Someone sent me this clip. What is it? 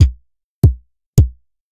Rhythmmaker Randomized 140 bpm loop -15
Three kicks on the electronic drums with a triplet feel. This loop is part of the "Rhythmmaker Randomized 140 bpm
loops pack" sample pack. They were all created with the Rhythmmaker
ensemble, part of the Electronic Instruments Vol. 1, within Reaktor. Tempo is 140 bpm
and duration 1 bar in 4/4. The measure division is sometimes different
from the the straight four on the floor and quite experimental.
Exported as a loop within Cubase SX and mastering done within Wavelab using several plugins (EQ, Stereo Enhancer, multiband compressor, limiter).
loop, drumloop, electro, 140-bpm